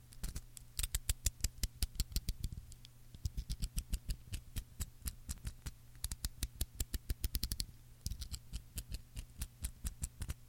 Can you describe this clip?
moving the slider of an utility knife up and down